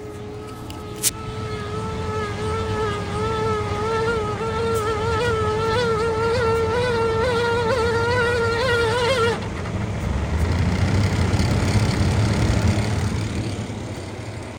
Squeeky fan resolution
Recorded on iPhone 12 of a dual-blade window fan where on of the fans/blades starts off stuck, squeaks, until it catches up to the other
fan, hum, ventilation, noise, mechanical